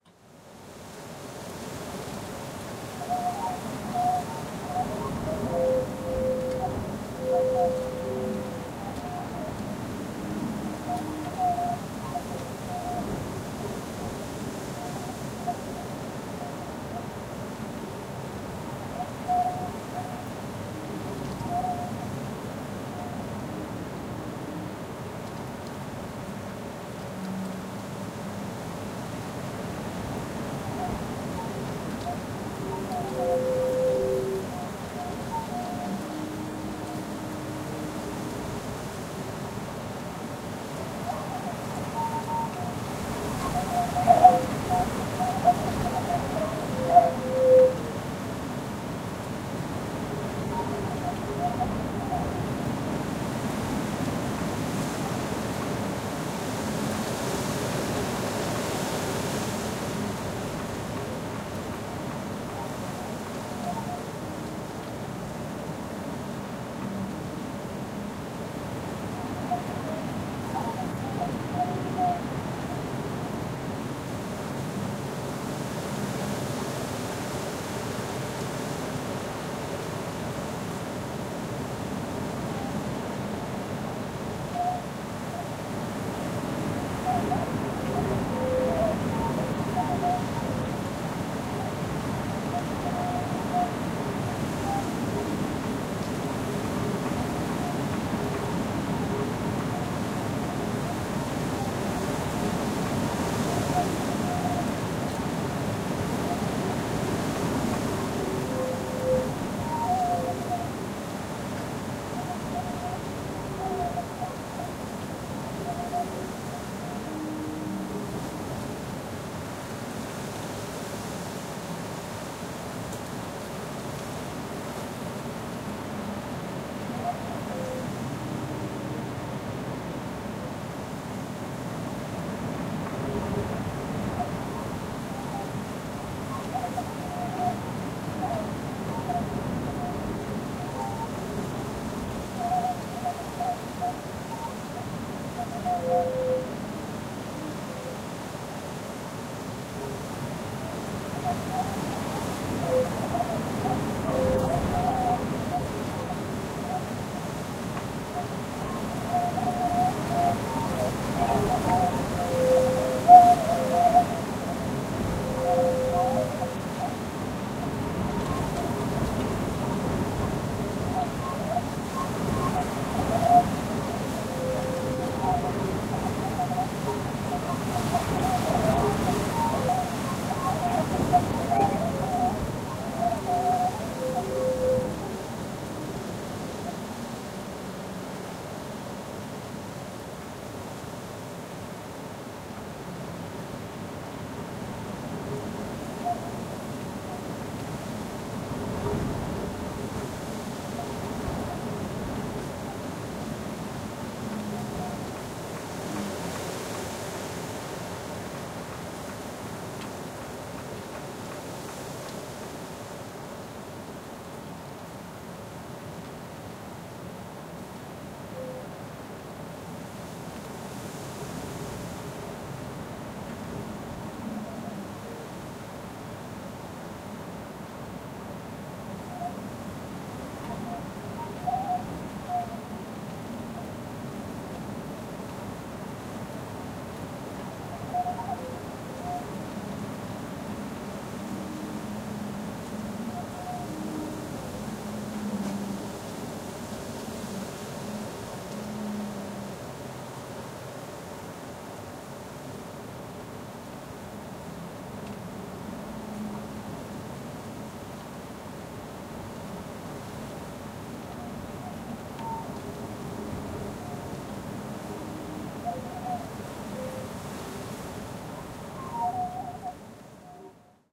A stereo recording of wind blowing on a tree and aluminium tubular scaffolding. Rode NT4 in blimp> Fel battery Pre-amp > Zoom H2 line-in.